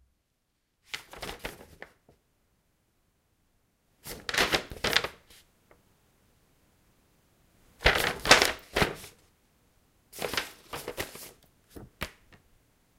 The sound of rustling paper.
crinkling, effect, foley, fx, noise, office, paper, rustling, sound